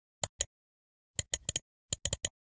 Mouse Clicking 001
High quality recording of a computer mouse...
clicks, sound